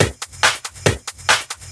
TECHNO LOOP NETWORK23
techno loop mash it up compression distortion